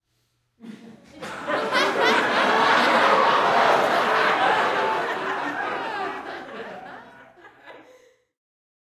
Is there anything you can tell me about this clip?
S Short Laughter - alt staggered2
These are canned crowd sounds, recorded for a theatrical production. These were recorded in quad, with the design to be played out of four speakers, one near each corner of the room. We made them with a small group of people, and recorded 20 layers or so of each reaction, moving the group around the room. There are some alternative arrangements of the layers, scooted around in time, to make some variation, which would help realism, if the sounds needed to be played back to back, like 3 rounds of applause in a row. These are the “staggered” files.
These were recorded in a medium size hall, with AKG C414’s for the front left and right channels, and Neumann KM184’s for the rear left and right channels.
adults, audience, auditorium, crowd, group, laugh, laughing, laughter